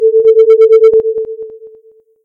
Satellite Signal 01
Satellite Signal.
If you enjoyed the sound, please STAR, COMMENT, SPREAD THE WORD!🗣 It really helps!